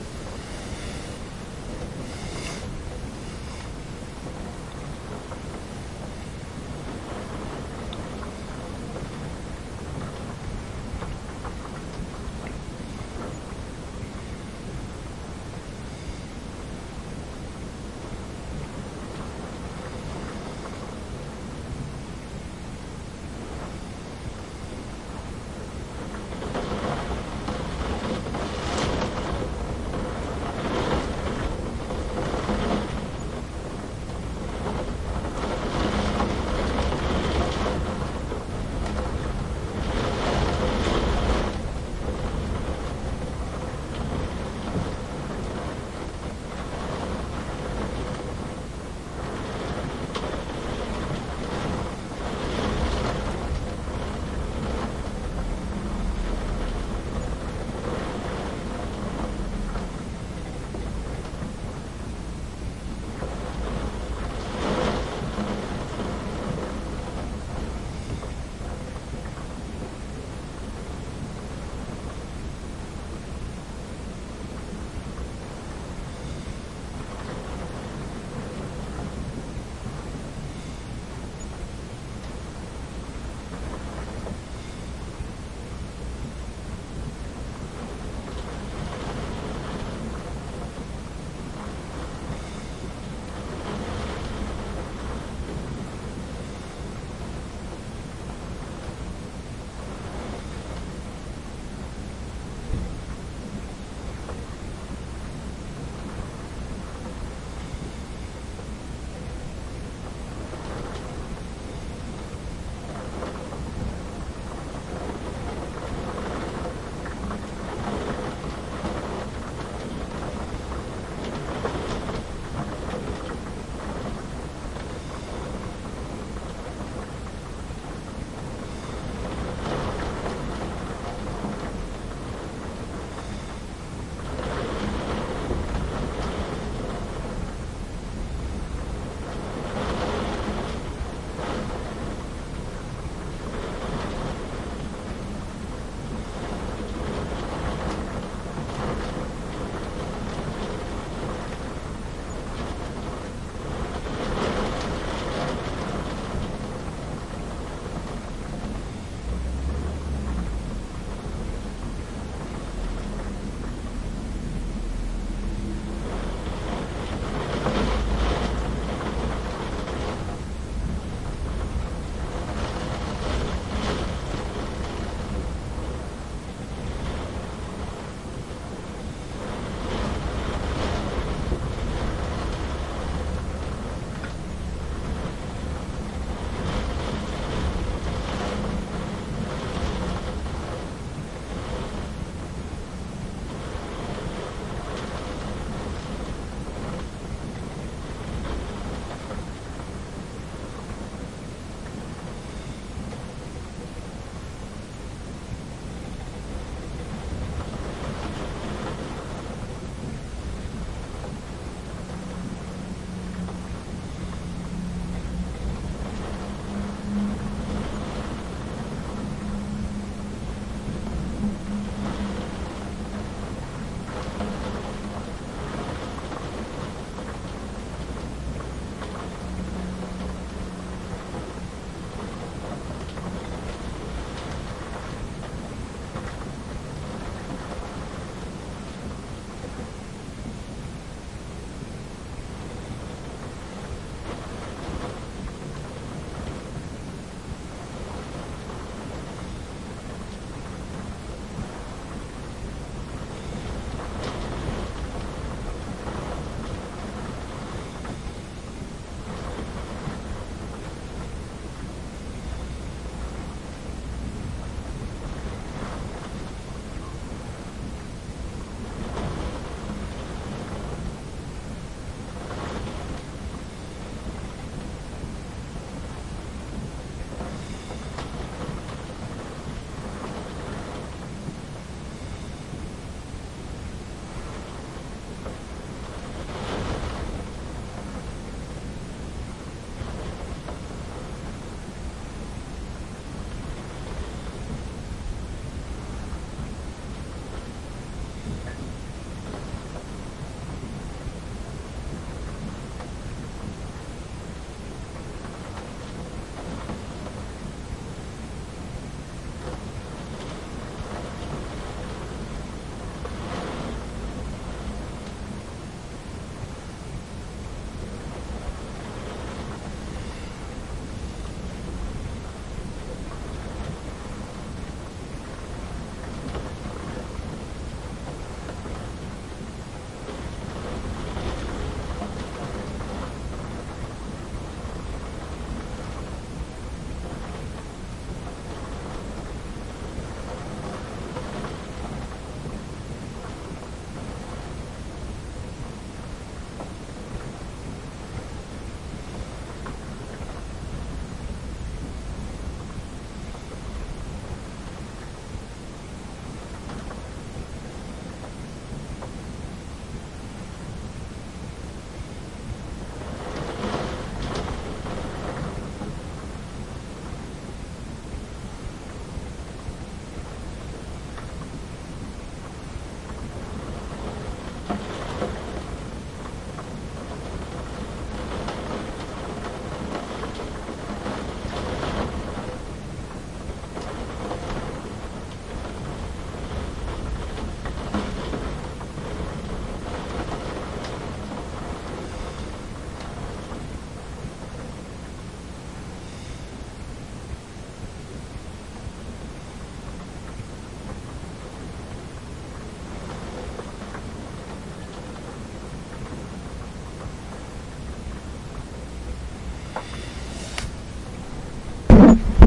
28-Oct-2013 3 51 edit
28-Oct-2013 the South of the United Kingdom experienced some hurricane speed winds, something quite unnusual in the UK and not seen for many years.
The storm was going to hit our area in the early hours of monday. I kept my Zoom H1 recorder next to my bed and when the winds picked up I put it on the window seal.
Unfortunately I had set the recording volume too low, so I had to amplify the recordings in Audacity by over 40dB. There is quite a bit of hiss, but you can still hear the wind noises.
The file name specifies the date, hour and minute when the recording was taken.
window, Zoom-H1